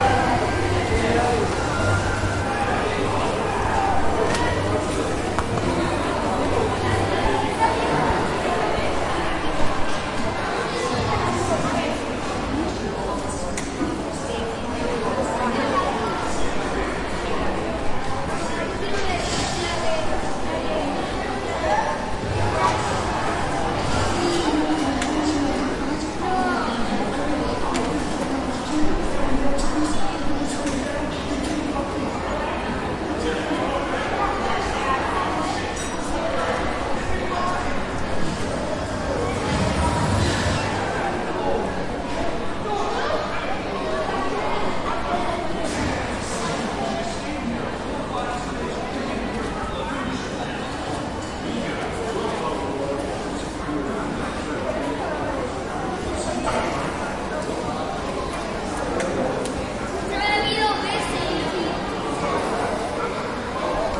Sounds from a cinema, lots of people talking (in spanish) and movie trailers being played.
Recorded with a Tascam DR-40 in A-B mode
ambience, cinema, crowd, field-recording, people, voices